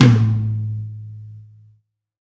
tom classic 2
a dw tom set recorded with a Shure SM57 mic
on WaveLab added 2 harmonic sets 2 time [one harmonic set of two is consisted of one octave up and after 3 ms two octaves up but at a lower volume] are added after 7 ms from the attack maximum peak and again a bit lower after 12 ms from the latter double harmonic.
Very classic tom harmonics for toms. A must have mainstream.
drum, drumkit, tom, tom-tom